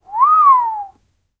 Awe Whistle, A (H4n)
Raw audio of an awe whistle - the sort of whistle you would make if you saw something incredible that takes your breath away. Recorded simultaneously with the Zoom H1, Zoom H4n Pro and Zoom H6 (Mid-Side Capsule) to compare the quality.
An example of how you might credit is by putting this in the description/credits:
The sound was recorded using a "H4n Pro Zoom recorder" on 17th November 2017.
whistling, whistle, wow